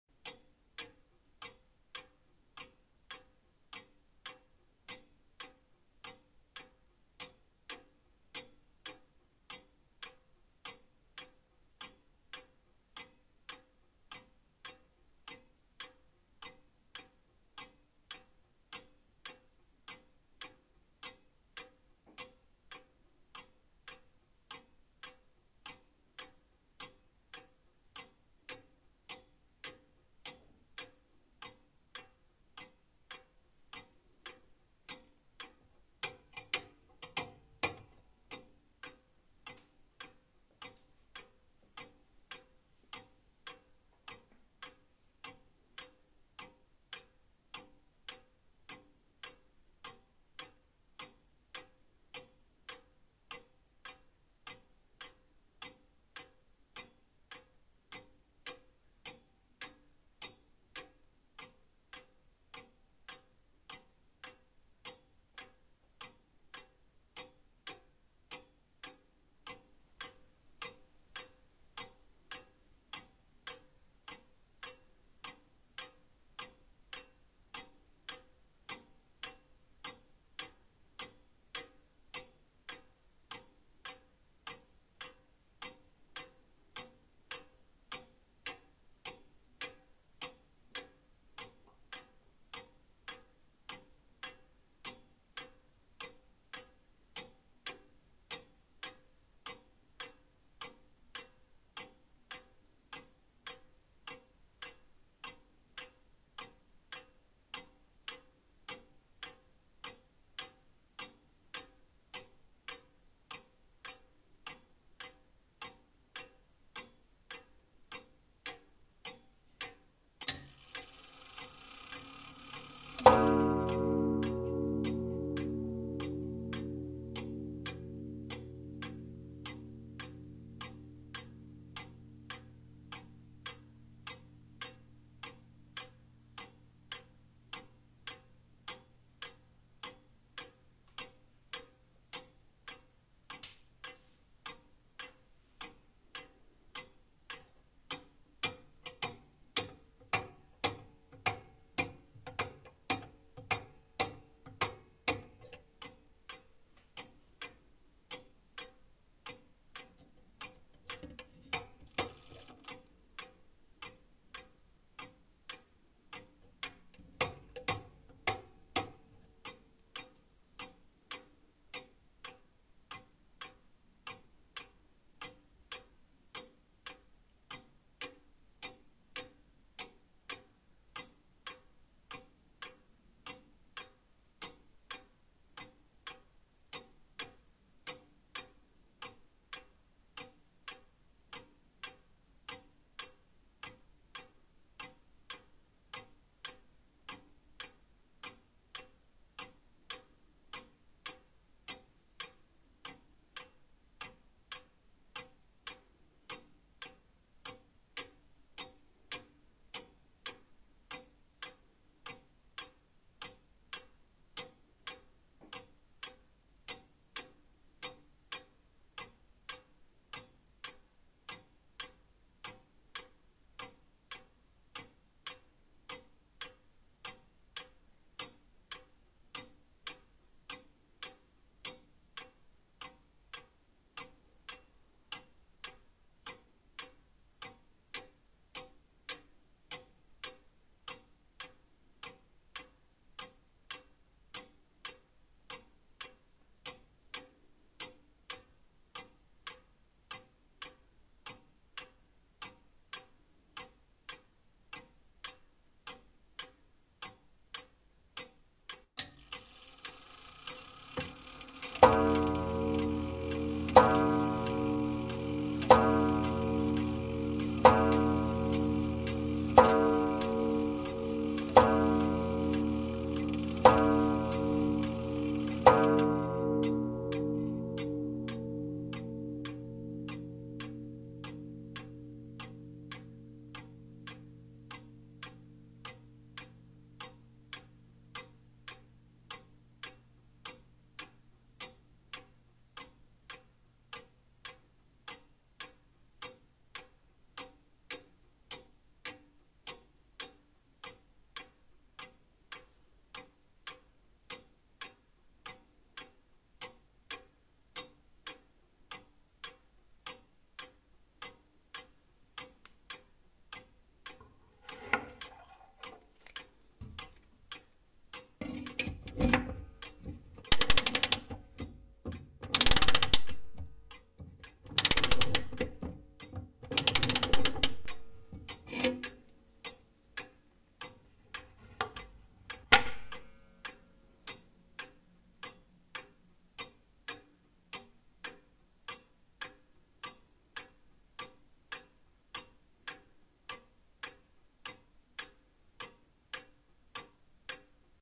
Pendulum clock
My wall clock from the year 1913. Nice slightly irregular tick-tock sound, one'clock chime and later eight o'clock chime. Near the end winding-up sound.
Recorder: PC, Lexicon Alpha
Microphone: DEXON MC100 condenser microphone
Processing: None
chime, clock, pendulum, pendulum-clock, tick, vintage, wall-clock